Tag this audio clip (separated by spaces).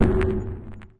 electronic percussion stab